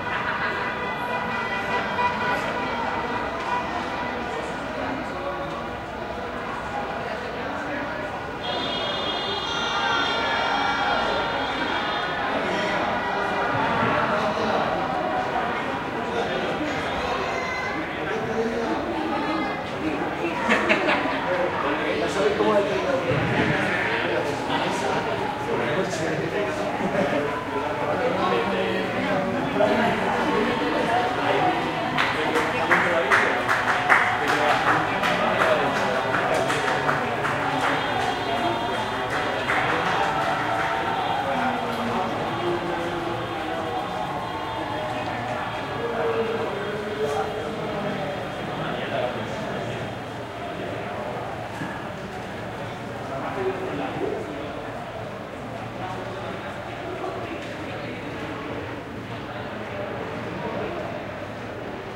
20100711.worldcup.14.celebration
people celebrating the victory of Spain in the 2010 FIFA World Cup final (Spain-Netherlands). Sennheiser MKH60 + MKH30 into Shure FP24 preamp, Olympus LS10 recorder